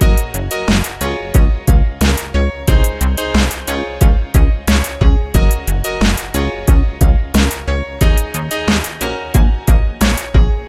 Autumn Loop
I nice little loop created to work as a Ringtone for my cellphone.I used the Clap and some bits of the Rap Loop (Just cut a sound out).